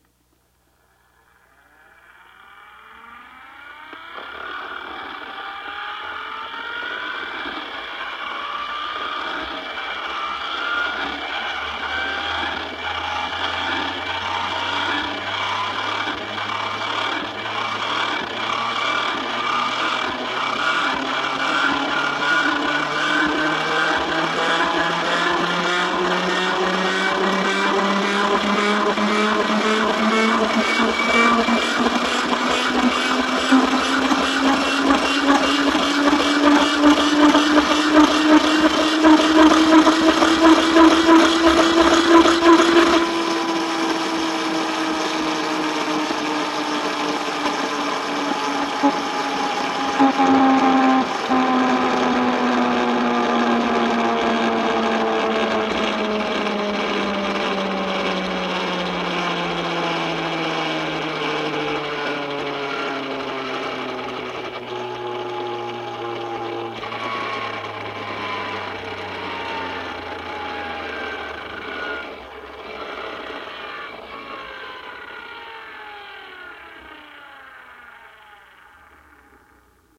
toy truck single
My youngest son got a toy truck for Christmas, it's got a large flywheel in it and makes a distinctive whirring sound when the wheels are turned. It reminds me of the Tasmanian devil sound in old Warner Brothers cartoons.
Recorded with SM58 to a Dell notebook with an audigy soundcard.
rev, soundeffect, tazmanian-devil, whir, zoom